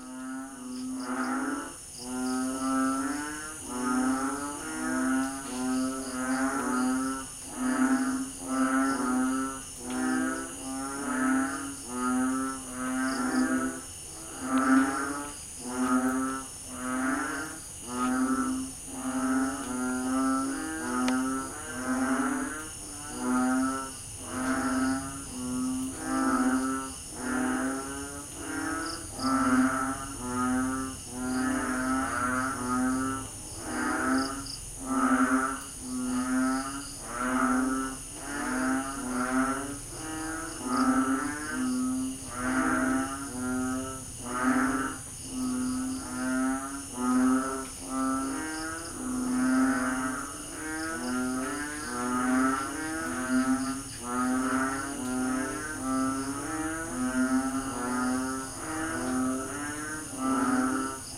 Recorded with Canon S5IS in fall of 2009 after the devastating storms that hit the Philippines. Palawan was spared serious damage. We live in Puerto Princesa, Barangay San Jose, Palawan, Philippines.
frog, field-recording, frogs, puerto-princesa, philippines, palawan